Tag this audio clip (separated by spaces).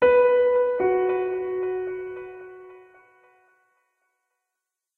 phrase delay reverb piano calm